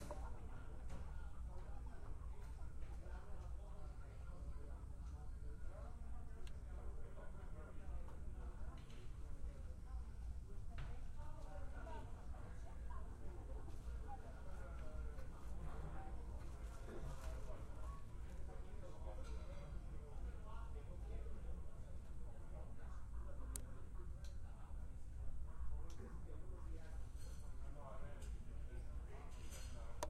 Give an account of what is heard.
DFW Airport
A quiet day at the airport
airport, dfw